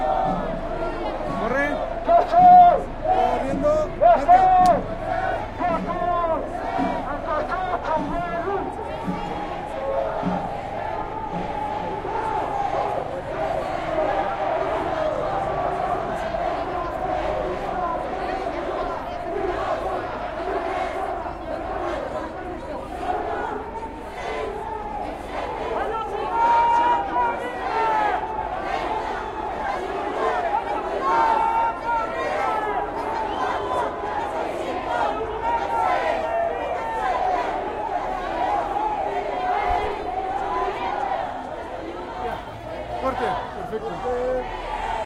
ENTREVISTA-2-T025 Tr5 6
a mob ambient in mexico to commemorate the killed students in 1968... streets, crowd, students, people, mexico, everything in spanish